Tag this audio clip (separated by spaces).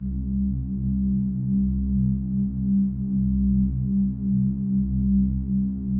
unglitch unraged dub